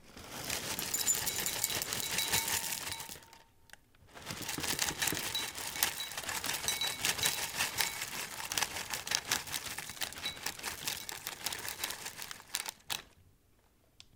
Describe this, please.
Cereal Pour
Cereal being poured into a bowl
Breakfast, Cereal, Sound-effect